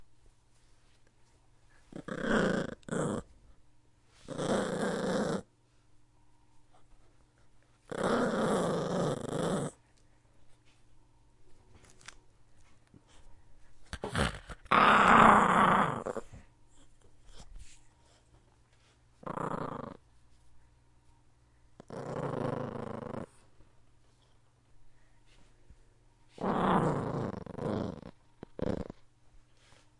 dog growling 1
angry, CZ, dog